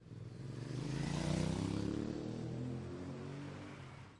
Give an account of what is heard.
Car-MovingAway 1
You can hear the noise of a car moving away.
SonicEnsemble, UPF-CS12, car, guitar, motor, move, street, traffic